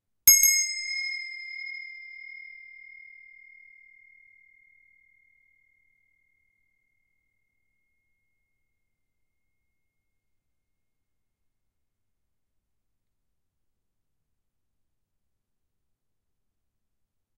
brass bell 01 take6
This is the recording of a small brass bell.
bell, ding, brass